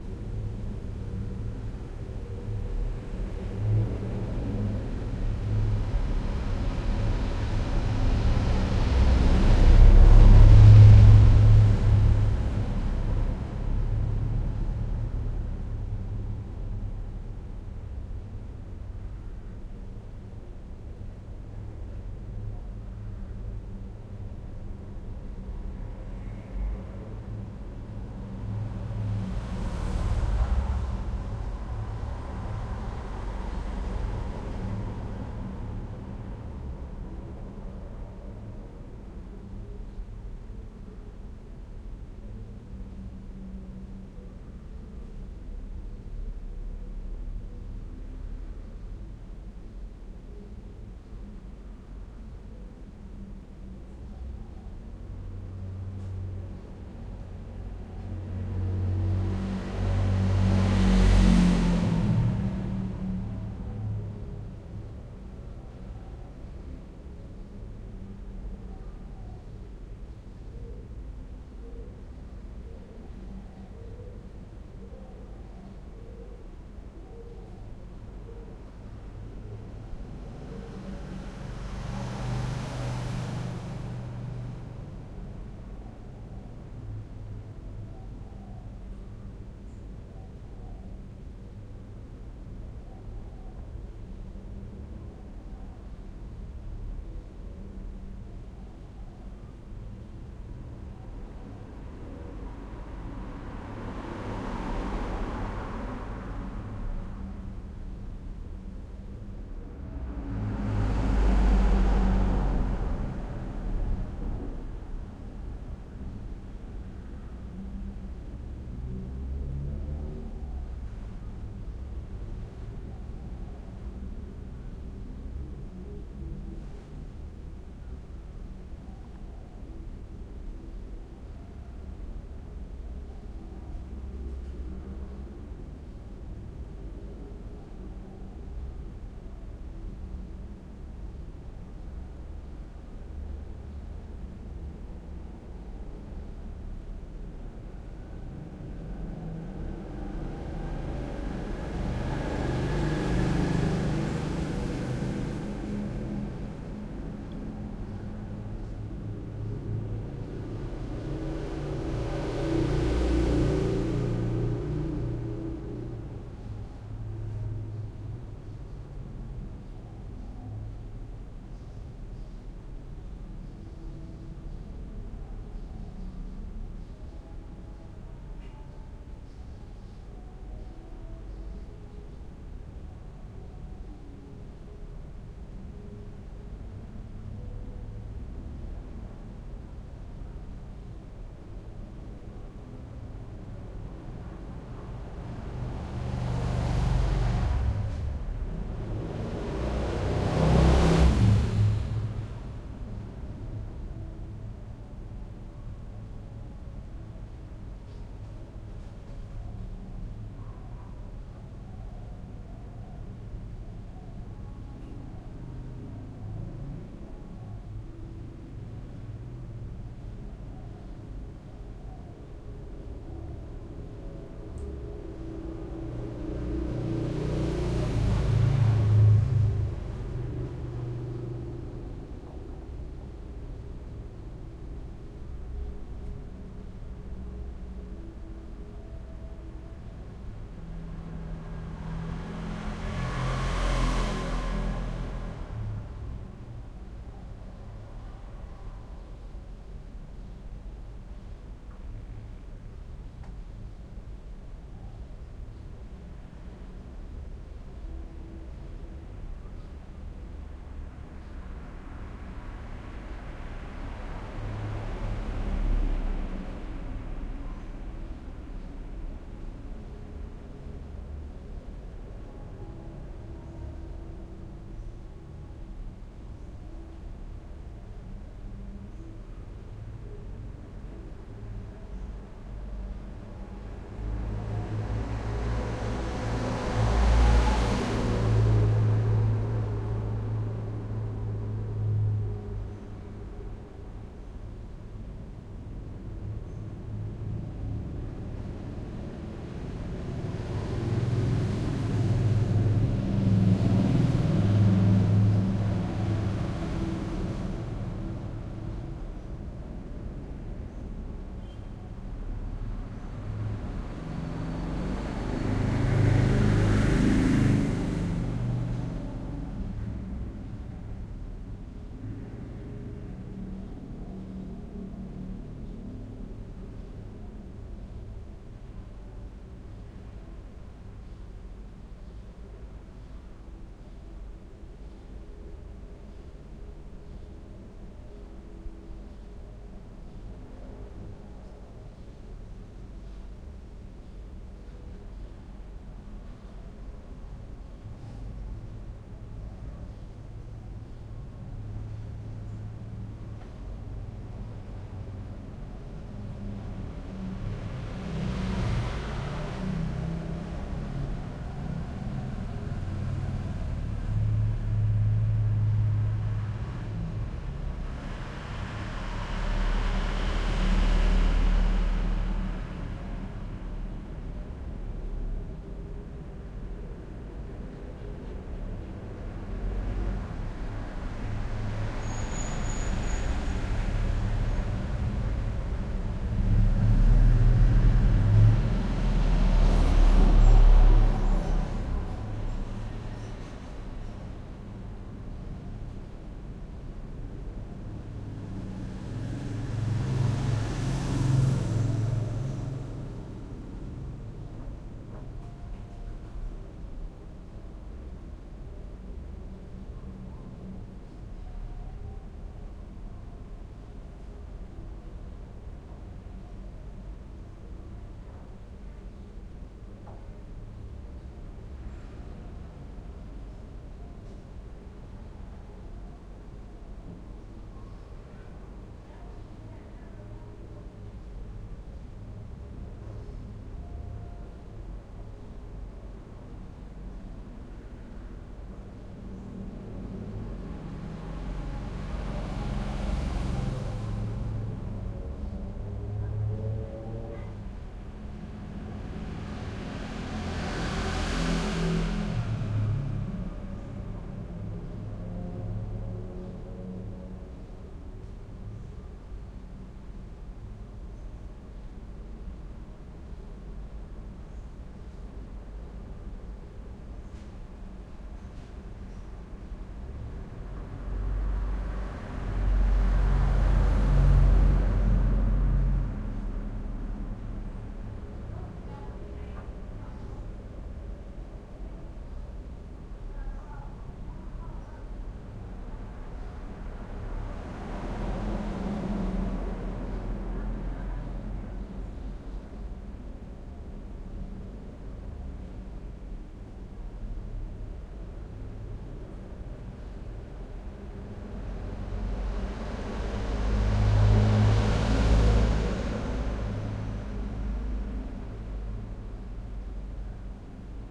Thailand room tone small live room with resonant throaty bassy traffic passing by through open windows
Thailand, roomtone, windows, live, small, field-recording, open, traffic